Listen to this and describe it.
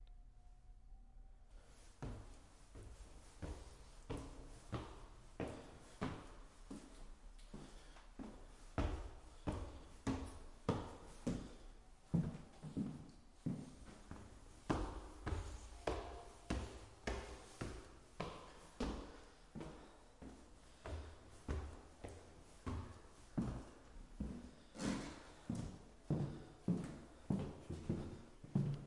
Jumping Reverb
I think this was my son jumping on a wooden stage in a large hall.
Field-Recording, Inside, Jumping, Reverb